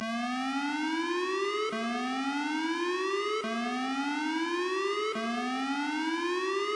maked TB-303 clone.